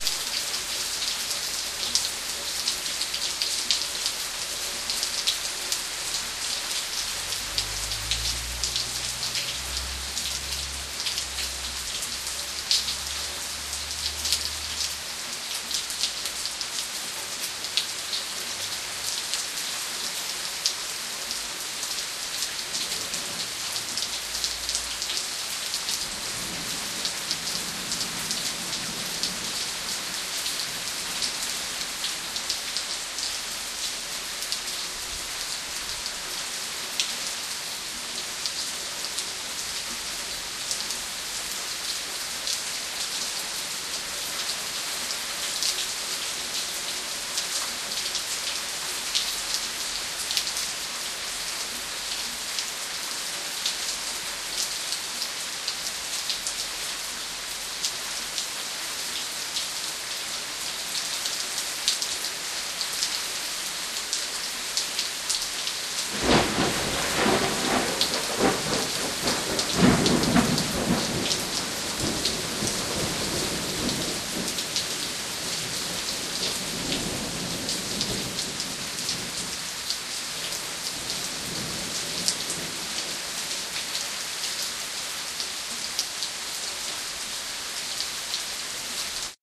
memorial rain1
Memorial Day weekend rain and thunderstorm recordings made with DS-40 and edited in Wavosaur. Rain on the patio in the Sunshine State.
rain, storm